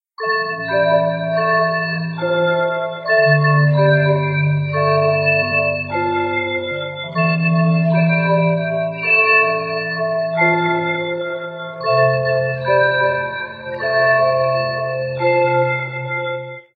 Audacity effects on tone and noise.
Forgiveness is a strength.
Audacity, Basic, Bing, Bong